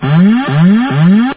Autopilot Disconnected Warn
Autopilot Disconnected Alert